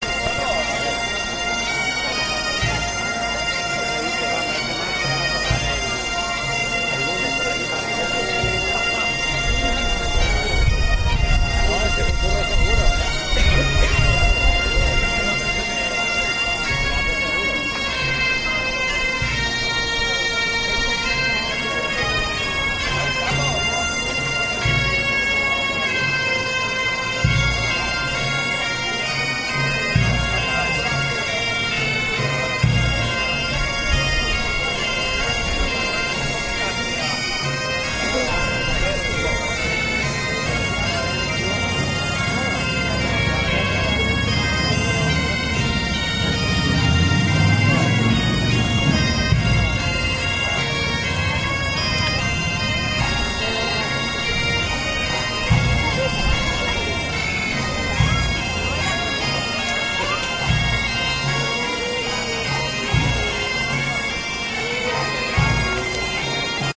A band of bagpipes on parade in northern Spain.
bagpipes, parade, spain, drum, play, playing, drumming